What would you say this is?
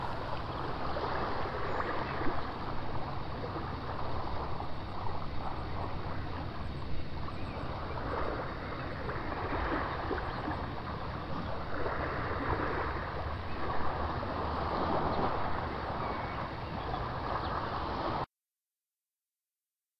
Beach and Birds
Two combined audio tracks, one of the water washing ashore, the other of the birds in the woods.
birds, nature, ocean, outdoors, scotland, summer